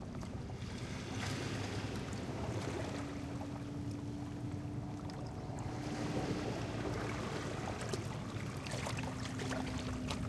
Teluk Nipah 04
Waves runnig on rocks at the beach in Pangkor Island
Water, Splash, Waves